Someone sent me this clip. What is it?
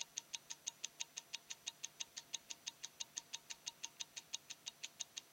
Seiko quartz watch tick
2000s Seiko automatic watch 7S26 movement. Recorded with contact mic.
tick-tock, quartz-watch, seiko-7S26, automatic-watch, seiko, ticking